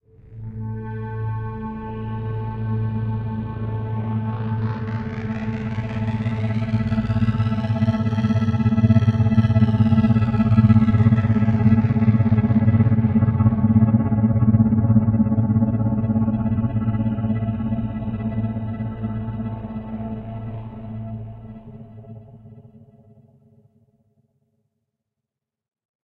A synth texture.
pad, synth, texture